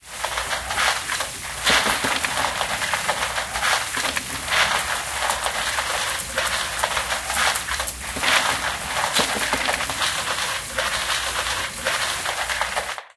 Maquinaria Fondo
foley sound of gears
rocks, chains, Foley, machine